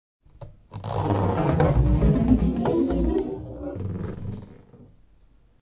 Processed Balloon Sequence
Stroking a Balloon in various ways, processed.